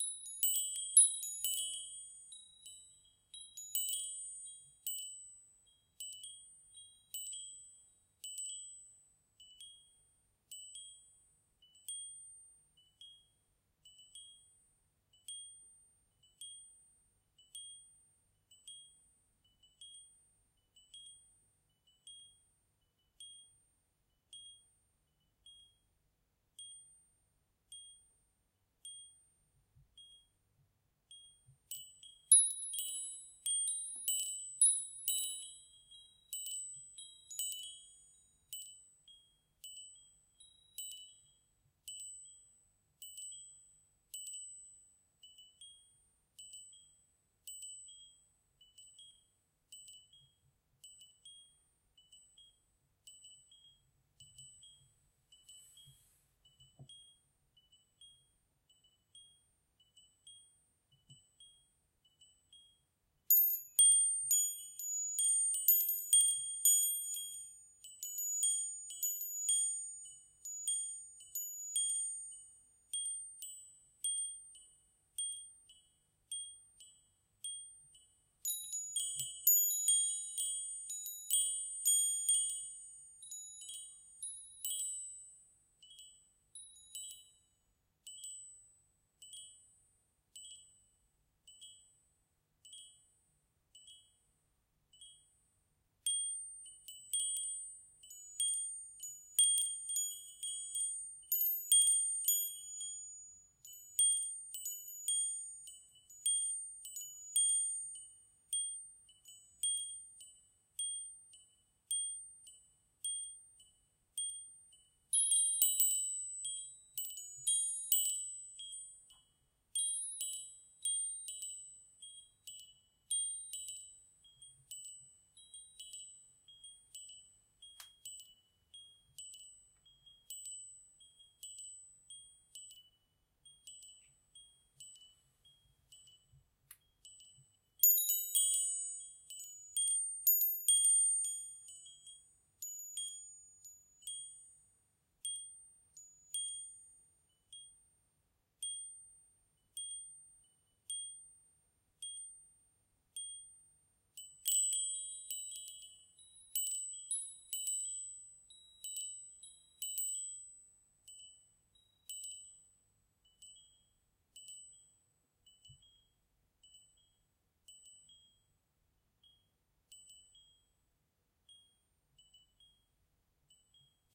Small Metal Wind Chime 001
Short recording of a small, metal wind chime which hangs in our hallway.
Recorded indoors with a Zoom H2N.